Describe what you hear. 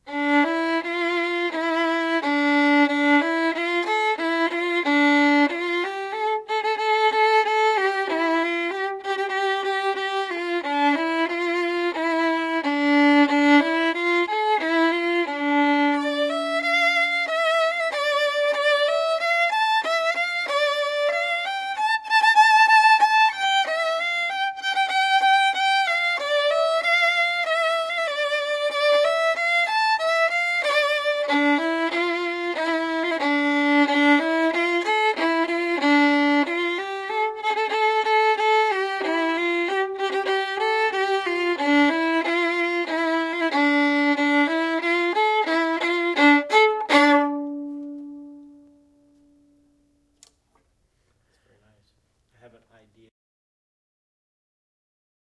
hoochie violin
Violin solo. A short impression of "The Streets of Cairo". Played by Howard Geisel. Recorded with Sony ECM-99 stereo microphone to SonyMD (MZ-N707).
hoochie-coochie, music, solo